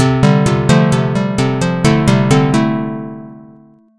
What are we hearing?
plucked seq
ambient, loop, 130bpm, plucked, sequence